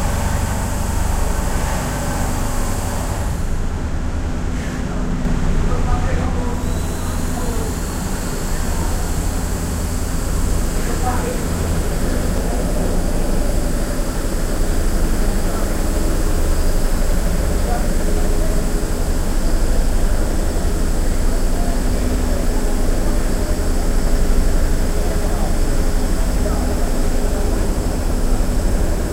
Tea factory, stage 3/3. Packing the tea. Java, Indonesia.
- Recorded with iPod with iTalk internal mic.